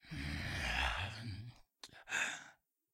Scary groan from monster
Scary groan for video games clear and HD.
dark, gamesound, gaming, groan, growl, horror, moan, monster, roar, scary, snarl, undead, zombie